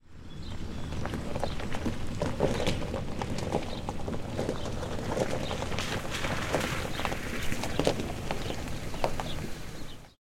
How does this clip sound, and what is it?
Car driving sounds